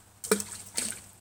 small rock dropped into creek
Dropping a small rock into water
stone, droplet, field-recording, tossed, into, stream, drop, nature, H2O, effects, splash, creek, dropped, spring, river, pebble, rock, liquid, drip, sound, natural, thrown, water